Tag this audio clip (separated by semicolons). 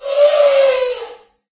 animal; elephant; scream